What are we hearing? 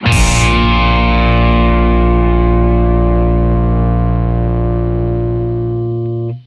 10 Bb death metal guitar hit
Guitar power chord + bass + kick + cymbal hit